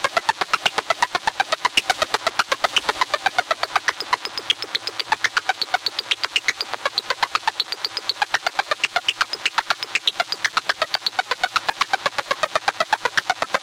I basically recorded and edited the sound with Audacity; this is most likely a sound from either a waveform sound generator or an analog synthesizer. I edited it by resampling, using Leveler, Amplify, Equalization, and Noise Removal. These may sound kind of eerie and annoying, depending on your opinions. Be careful - you may need to watch out for excessively high frequencies, and if you are listening with the volume turned up too high when listening to it, it'll damage your hearing! Same thing with the high frequencies!